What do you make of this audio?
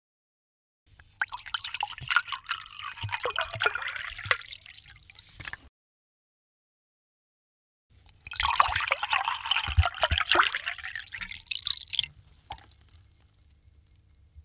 Water pooring
gurgle, splash, stream, liquid, babbling, water, flow